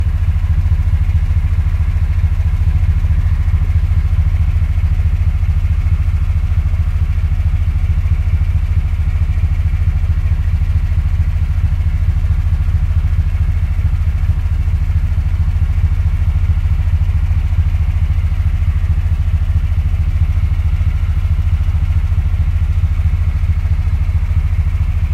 American eight-cylinder engine idling, loop.
Field recording.
Stereo.
field, american, stereo, loop, looping, slow, idling, sound, noise, SUV, field-recording, power, vehicle, ambience, idle, eight-cylinder, ambient, motor, car, tempo, big, engine, background, sfx, seamless, recording